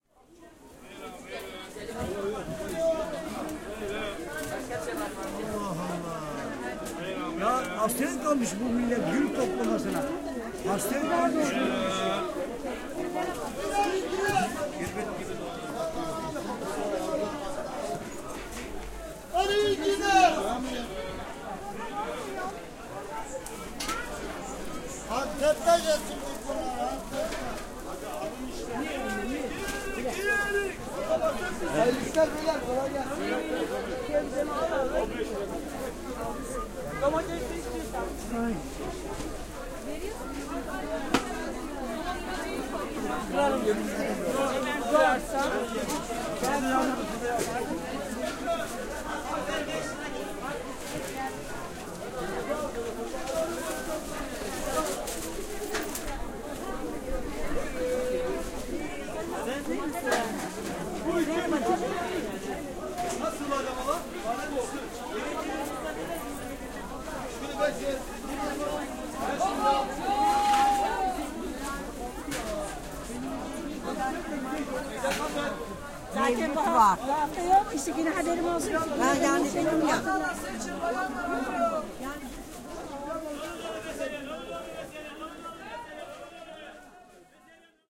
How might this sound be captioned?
stallholders sell their stuff
recorded Turkey/Istanbul/Salı Pazarı/
baran gulesen
bazaar, stallholder